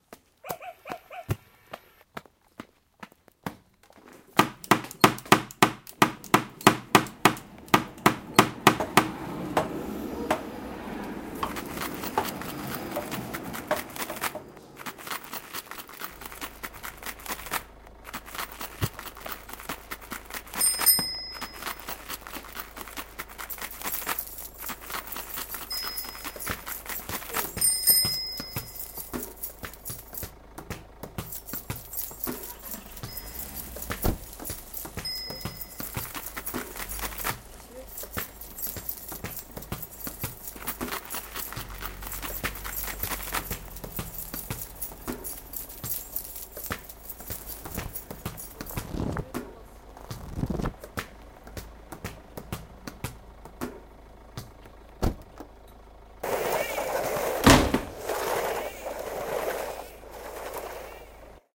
Sonic Postcard SPS Isolde
Belgium, Postcard